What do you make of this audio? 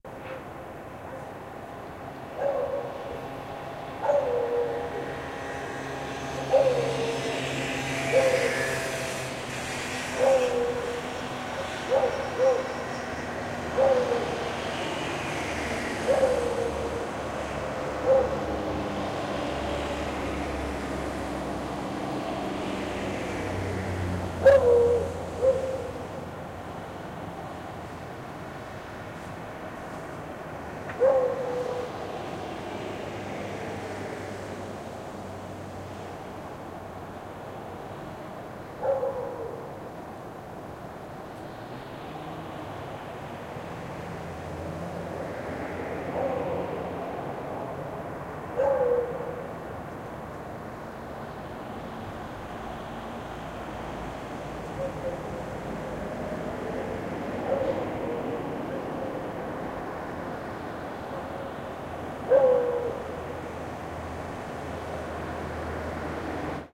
streetlife beagle 1
Beagle barking in a balcony (Barcelona). Recorded with MD Sony MZ-R30 & ECM-929LT microphone.
barking, street